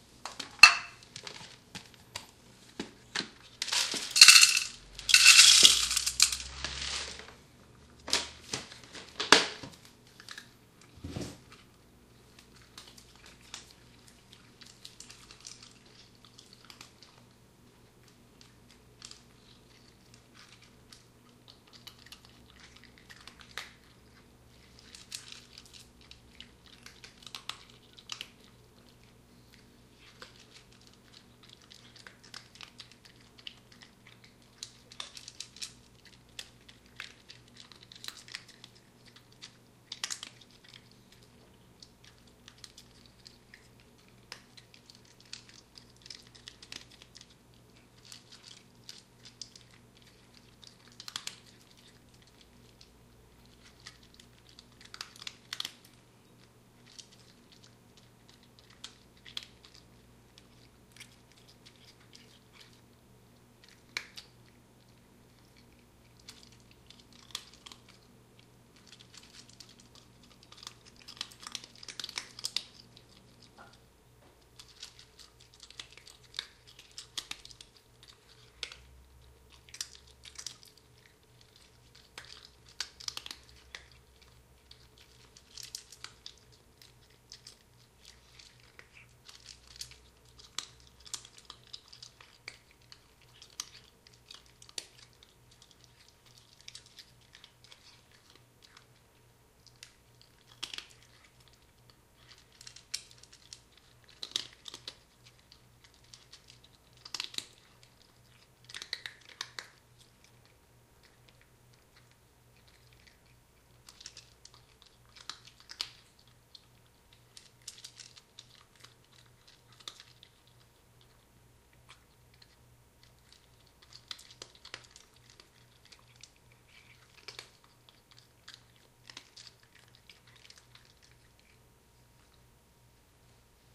Mocha and Chino dine on dry food from close perspective recorded with DS-40 with the stock microphone for the last time.
ambiance, cat, eating